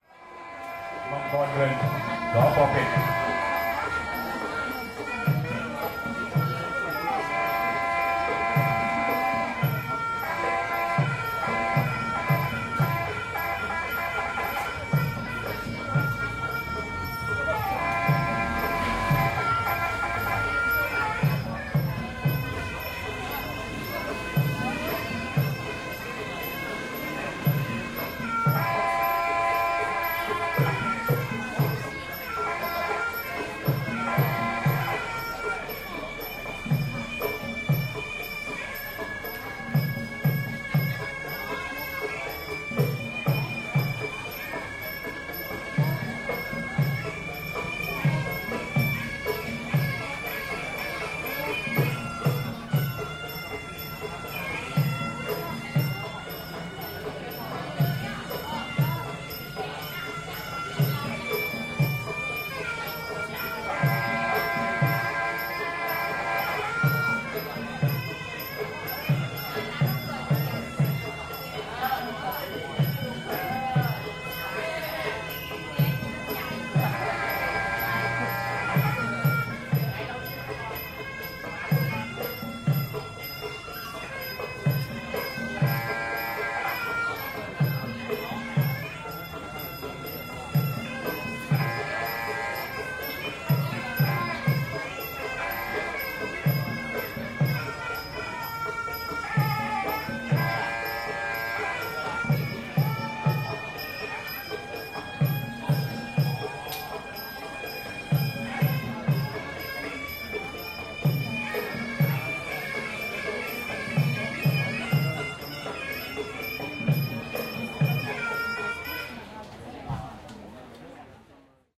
Muay Thai fighter's entrance
Muay Thai fighters entrance and dance with traditional thai music